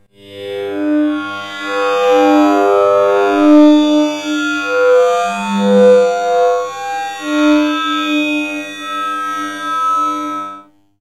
Robot Love Scream
Robot singing what it believes to be a love song.
Own voice recording edited with Audacity using delay filter.
scream, weird, creepy, robotic, digital, robot, cyborg